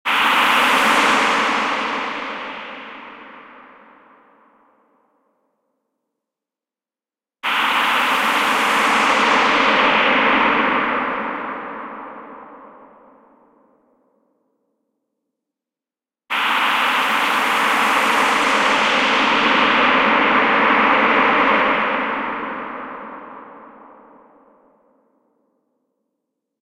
Bubbles to Noise #2
A transition from a "bubbly" sound to noise, with a big reverb. Created using Logic synth Hybrid Morph.
Space, Transition